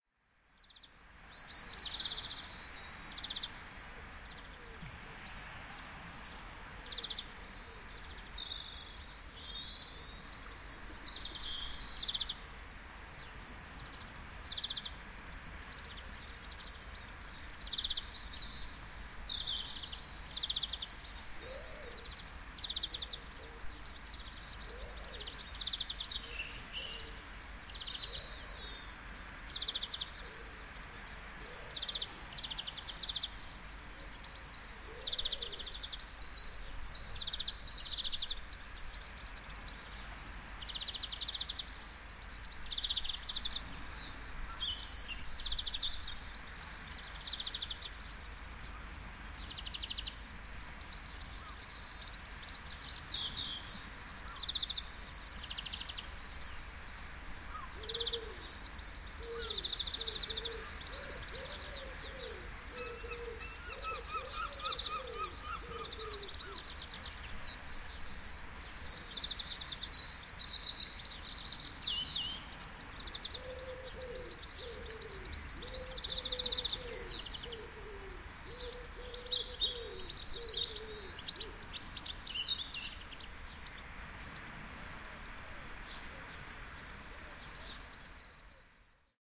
Dawn chorus distant ocean
Repetitive bird in tree. Ocean ambience in the distance with the odd seagull. Recorded in Walton-on-the-Naze, Essex, UK. Recorded with a Zoom H6 MSH-6 stereo mic on a calm spring morning.